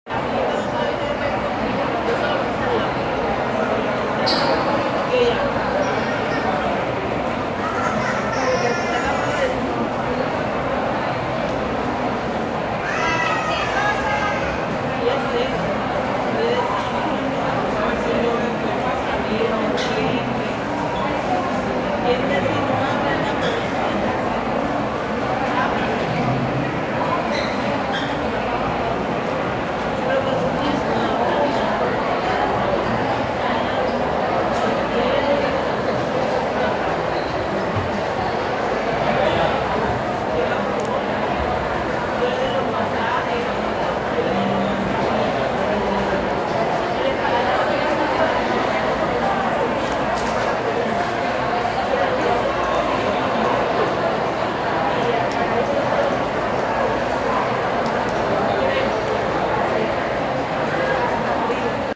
Food court, mall, plaza del sol